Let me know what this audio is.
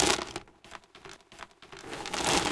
delphis ICE DICES LOOP #190 (JAZZ)
PLAY WITH ICE DICES SHAKE IN A STORAGE BIN! RECORD WITH THE STUDIO PROJECTS MICROPHONES S4 INTO STEINBERG CUBASE 4.1 EDITING WITH WAVELAB 6.1... NO EFFECTS WHERE USED. ...SOUNDCARD MOTU TRAVELER...